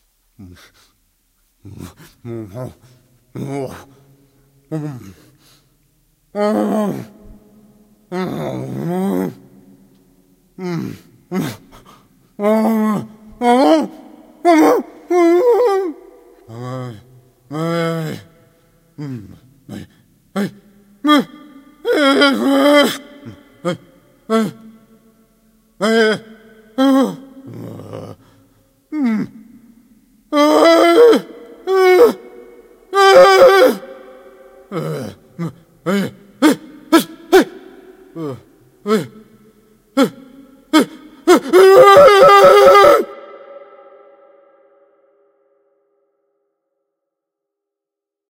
Dungeon of a asylum
Voices I made for a school project.